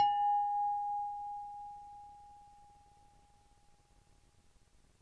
Soft strike on big and thin ceramic plate (darker)
Percussion, Plate, Ceramic, Kitchen